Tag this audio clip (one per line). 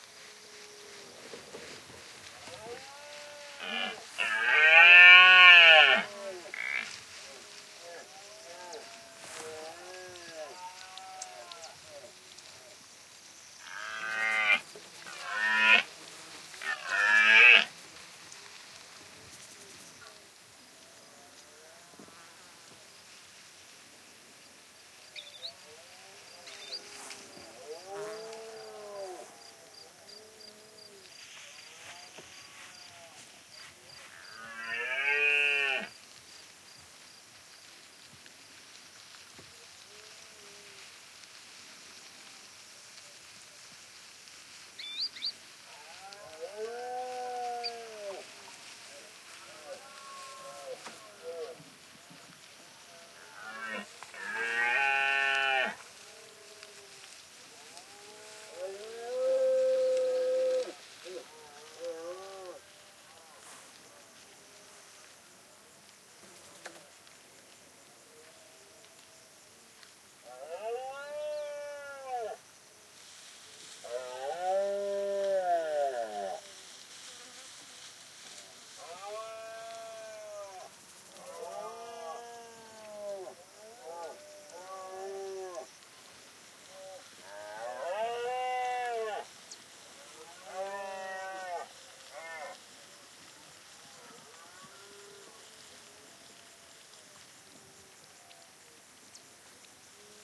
roaring
field-recording
male
sex
mammal
ungulates
donana
rut
red-deer
nature